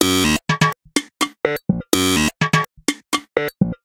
BuzzyPercussion 125bpm01 LoopCache AbstractPercussion
Abstract Percussion Loop made from field recorded found sounds